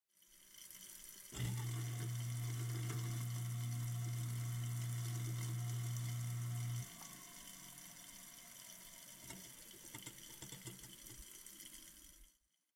A garbage disposal rumble in running water.